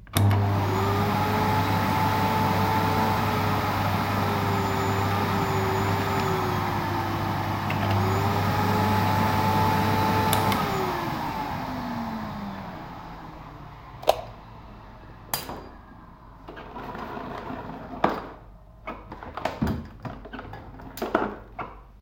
Vacuum Cleaner 3
Vacuum cleaner in action. Various sounds.
Recorded with Edirol R-1 & Sennheiser e185S.
air, switch, vacuum, vacuum-cleaner, vacuum-cleaning